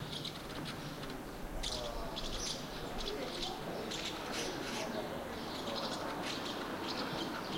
House Martin 3
The harsh sounds of house martins nesting under eaves in the delightful French village of Collobrieres.
house-martin, martin